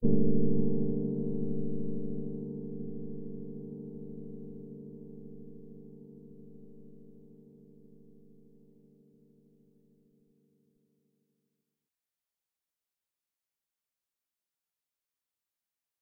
Ambient effect for a musical soundscape for a production of Antigone
effect, electric-piano, ambient, soundscape